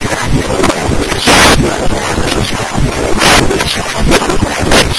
FM Voices 01
A looped "voice" with frequent bursts of static.
noisy, electric, radio, effects, glitch, deconstruction